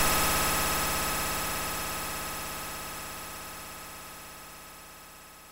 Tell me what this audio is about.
Alien teleport in operation
Technology, Teleport, Science-Fiction, Sci-Fi, Alien